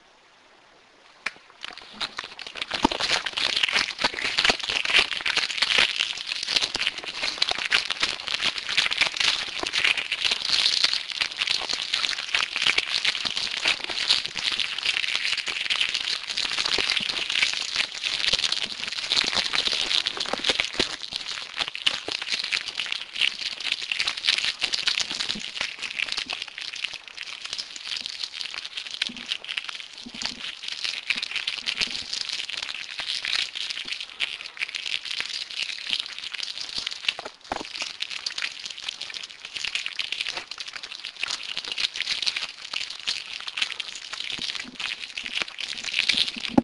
Crujido Papel
Field recording of paper noises